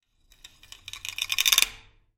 kazoo on heater
kazoo being dragged across a heater for a clanking sound
clank, heater, MTC500-M002-s13